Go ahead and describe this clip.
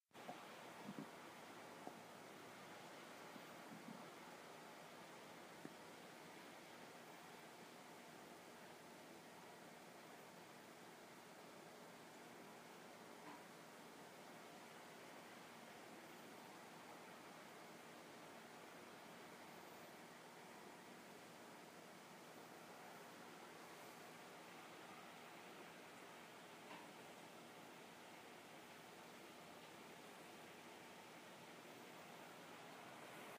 White noise1
Generic white noise, soft granular hum
general-noise, generic, muffled-fan, white-noise